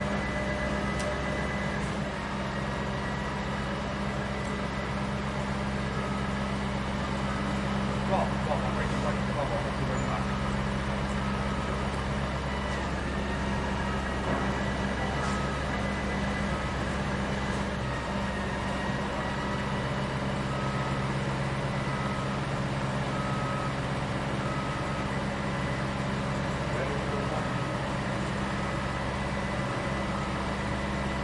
BUILDING SITE 1
Building site recording, machinery, could be used as an ambient background